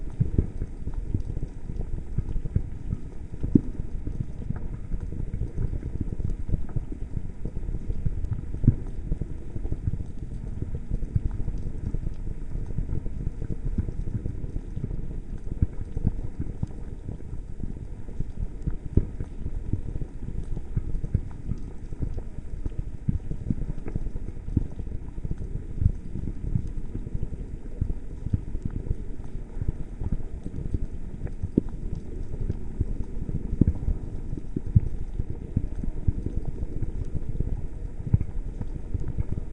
Lava Loop 4
A slowed down and heavily edited recording of a chemical boiling I recorded in my chemistry class. Sounds like a large reservoir of lava, so it be suitable in a volcano setting. Has less treble than Lava Loop 3. Loops perfectly.
Recorded with a Zoom H4n Pro on 08/05/2019.
Edited in Audacity